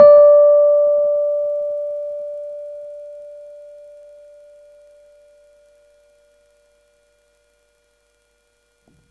just the single note. no effect.
rhodes, note